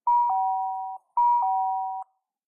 sil opndr dingdong
A MTR South Island Line public address, which only contains a sound effect of 'ding-dong' to notify passengers that the doors is opened.
Note that the pitch and speed of the announcements played on SIL trains may be higher/faster than what it should be.
announcement, public-address, railway, south-island-line